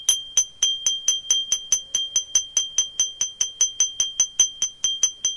This recording is of banging a key against a glass that is empty.